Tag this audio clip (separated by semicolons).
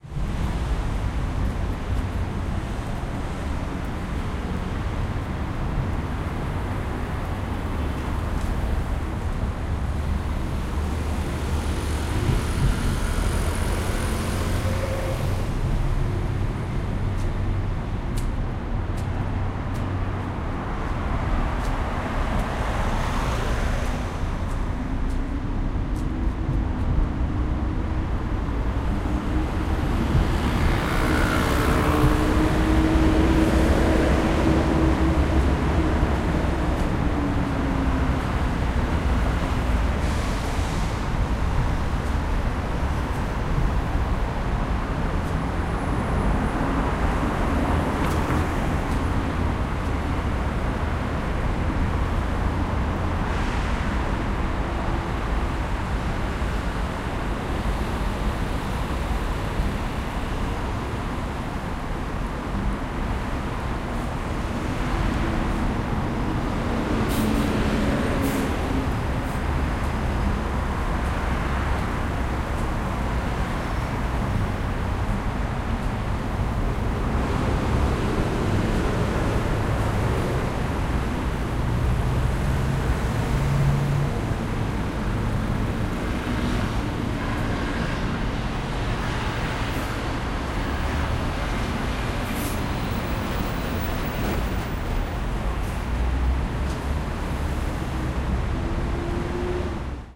sweeper; seoul; traffic; korea; field-recording